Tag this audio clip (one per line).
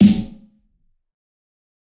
kit
fat
realistic
god
drum
snare